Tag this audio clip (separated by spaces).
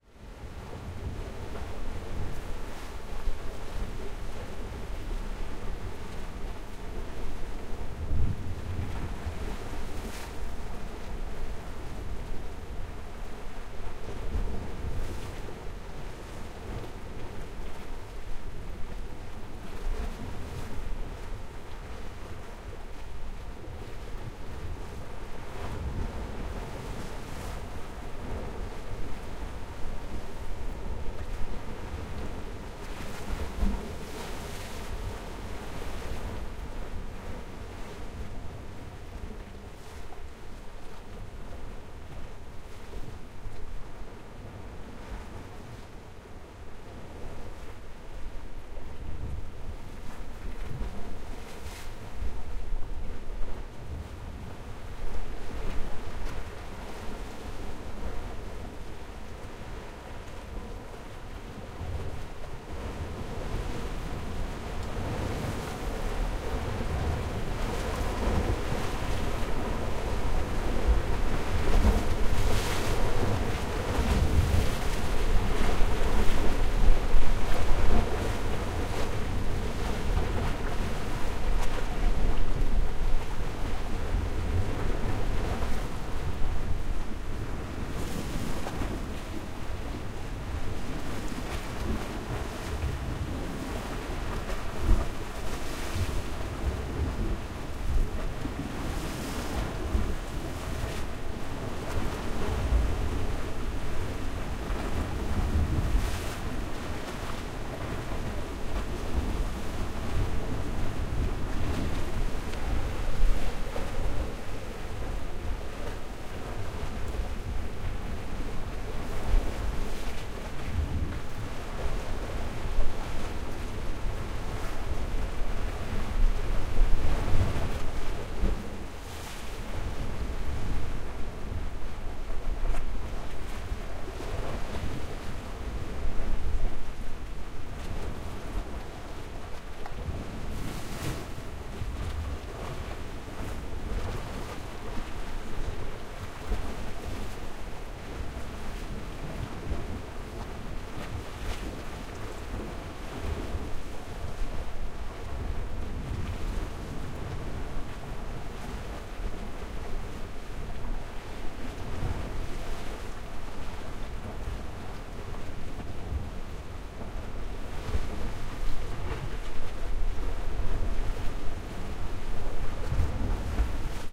Field-recording Waves